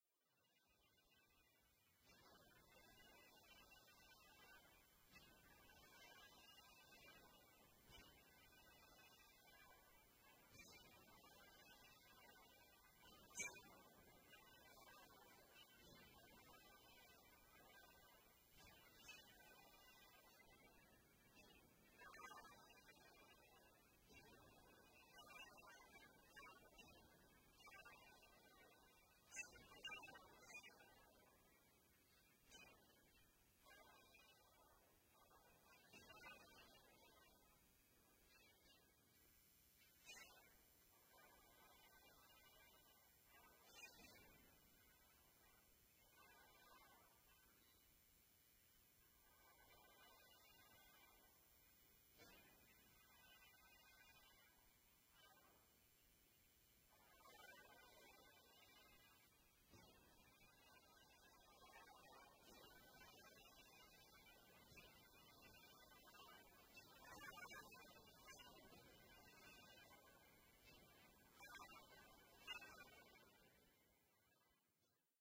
100 year old Windmill Waterpump
Sound of a 100 year old wind powered water-pump recorded using a homemade contact microphone handheld onto the steel frame of a 35ft high windmill. Edirol R-09HR
contact-mic, vintage, noise, metal, ambience, atmosphere, water-pump, rhythmic, soundscape, metallic, ambient